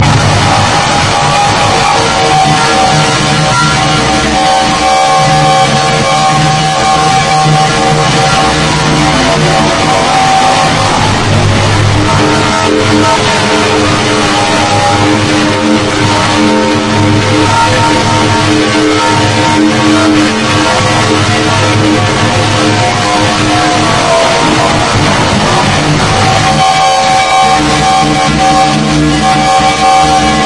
Insane electronic feedback
Absurd electronic feedback